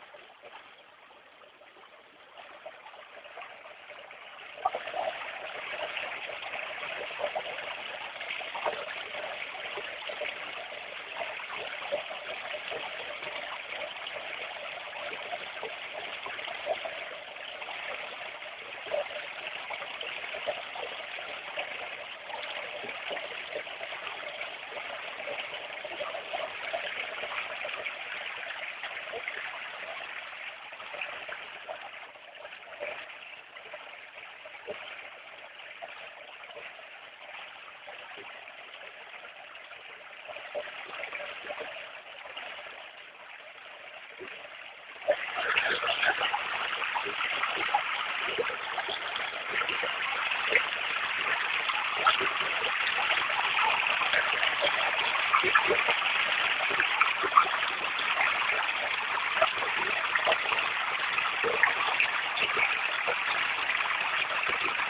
It's the sound of a torrent in the sud Tirol (Seefeld).
To the end of record you can heard the torrent's water under a small bridge.